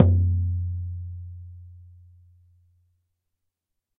Shaman Hand Frame Drum 06 02
Shaman Hand Frame Drum
Studio Recording
Rode NT1000
AKG C1000s
Clock Audio C 009E-RF Boundary Microphone
Reaper DAW